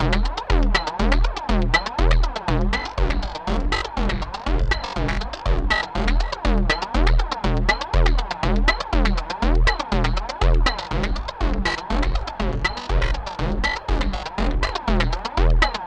Zero Loop 4 - 120bpm
Percussion, Zero, 120bpm, Loop, Distorted